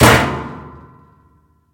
Appliance-Washing Machine-Door-Close-03
The sound of a washing machine's door being closed. This one was shut harder.